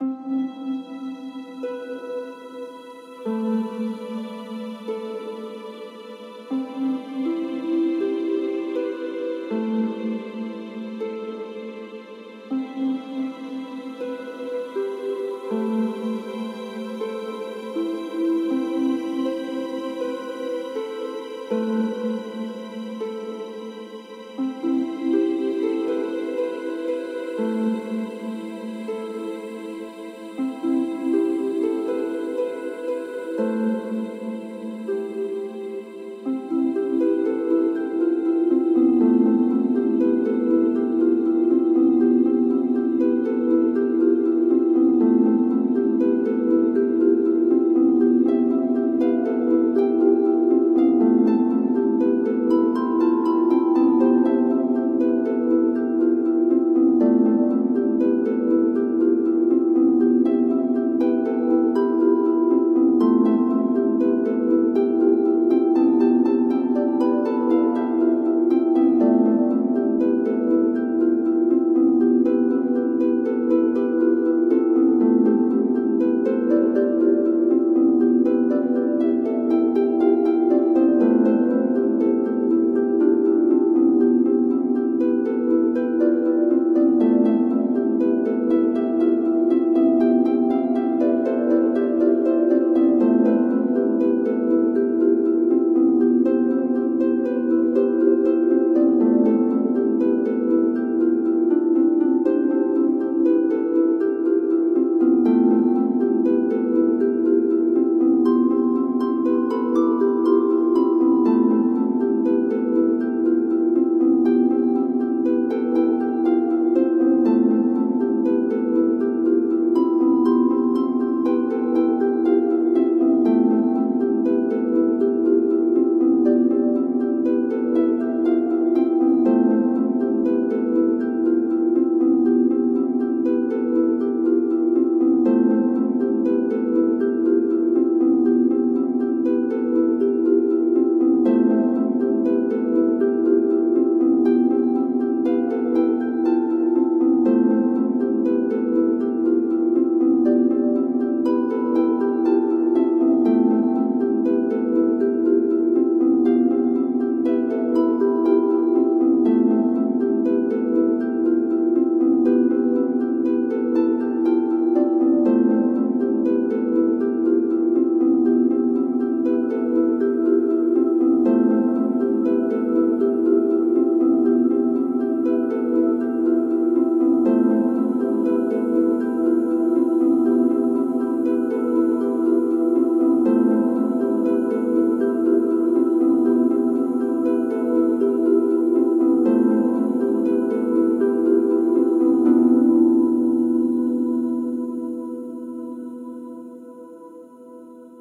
harp heaven
A smooth, soft and long easy listening harp tune suitable for using as background music or soundtrack for a video.
air, angel, beautiful, easy, feather, harp, heaven, kind, light, listening, long, music, simple, smooth, soft, soundtack, theme, track, white, wing